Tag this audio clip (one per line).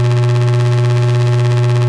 analog
bandpass
cs-15
drone
loop
synthesizer
two
voice
yamaha